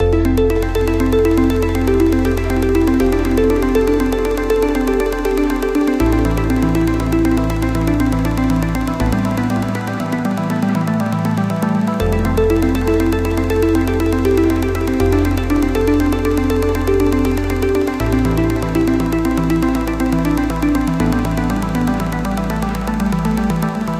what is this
Spiral Arpeggiator by DSQT 160 bpm
Fit for DnB/Dubstep/Trap projects due to its tempo.